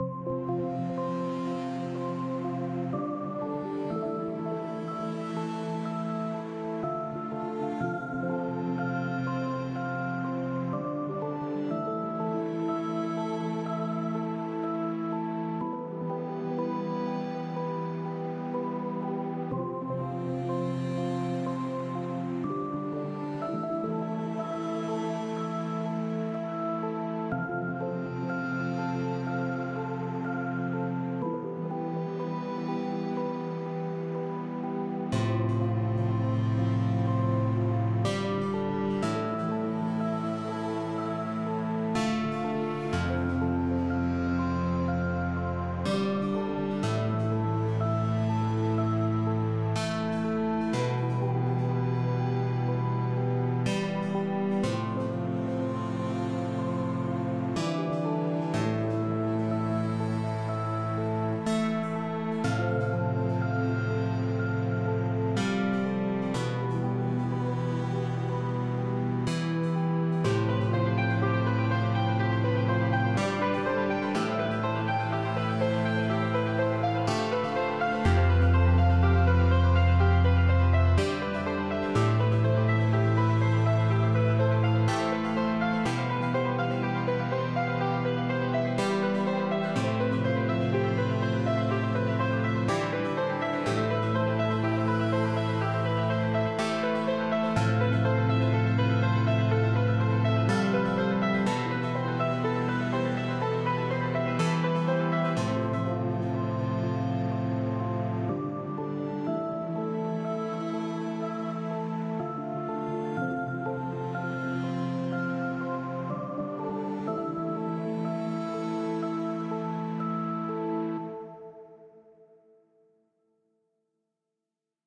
Electronic bells and chords.